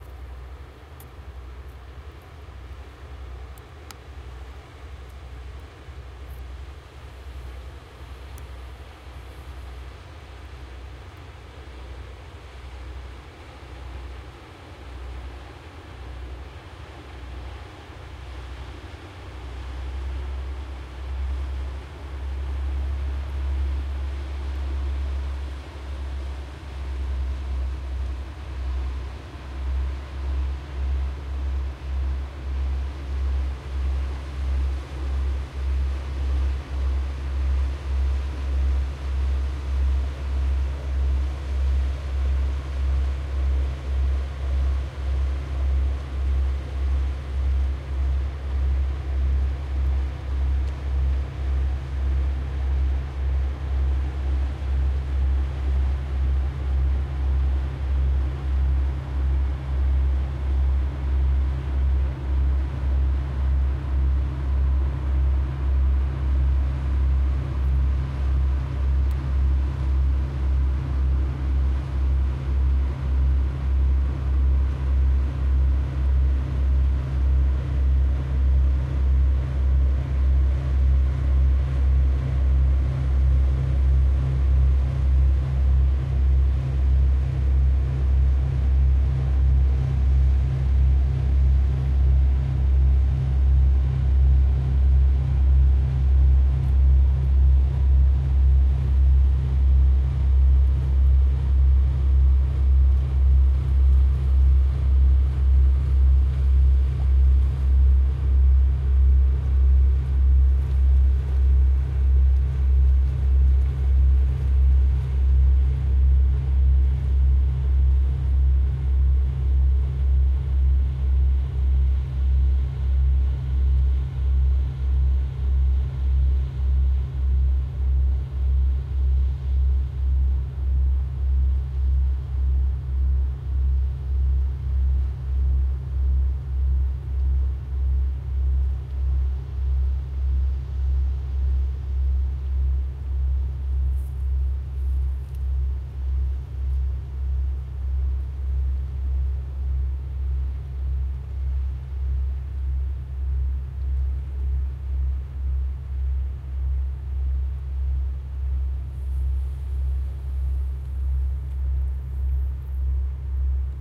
vessel rhine bockelson 20151101
This is the sound of an inland vessel on river rhine, near Mannheim, Germany. You can hear the rhythmic and fluctuating vibrations of the diesel engine. Seems to be an older one. Unfortunately I oriented my Zoom H2n wrongly (90° to the right). So most of the noise appears on the left ear.
barge, diesel, engine, field-recording, H2n, river-rhine, ship, shipping, vessel